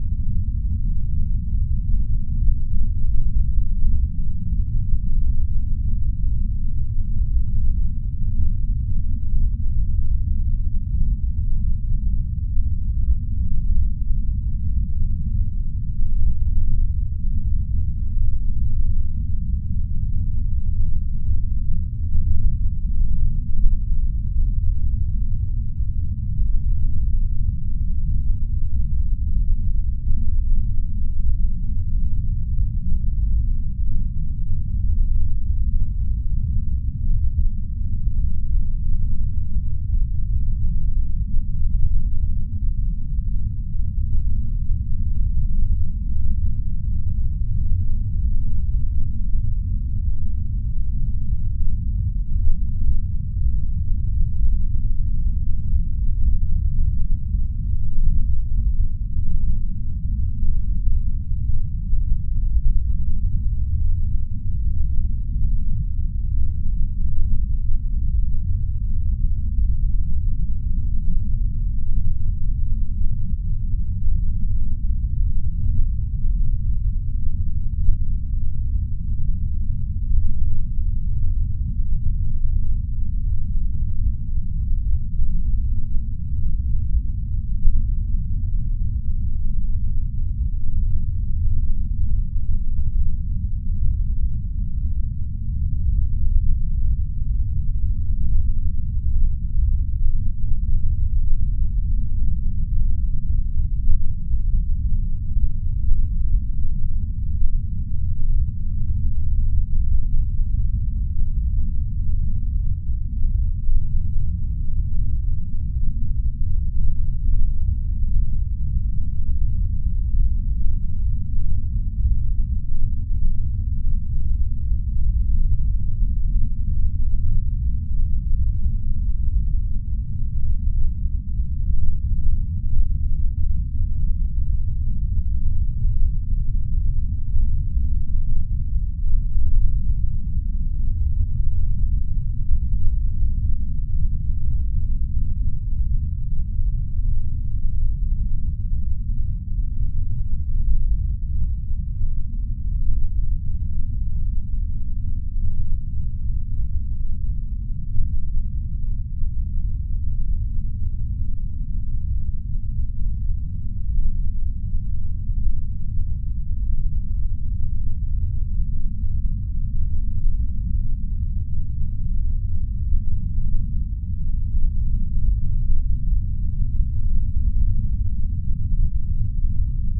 ambient loop2
Ambient space noise, made from a recording of me blowing into a microphone.